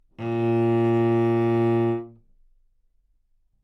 Part of the Good-sounds dataset of monophonic instrumental sounds.
instrument::cello
note::A#
octave::2
midi note::34
good-sounds-id::4308
Asharp2, neumann-U87